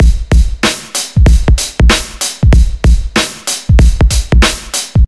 Tight Beat 95bpm
Heavy pumping hip-hop drum loop, Fruity Loops made, for those who wonder.
Need winning sound effects for games?
95, heavy, hi-hat, hard, beat, drums, loop, drum, kick, hiphop, rhythm, hip-hop, snare, bpm, drumloop